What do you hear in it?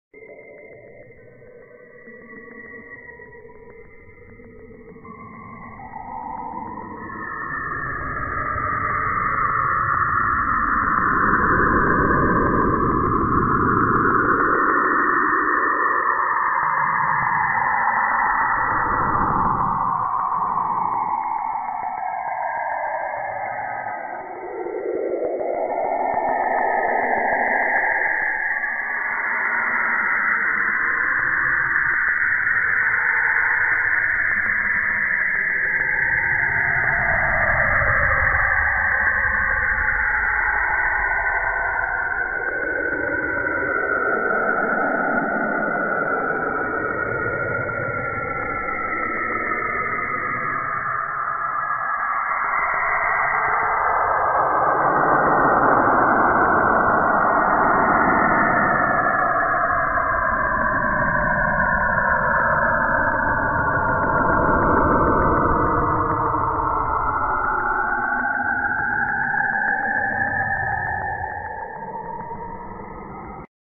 Real sound of Saturn
The radio waves are closely related to the auroras near the poles of the planet.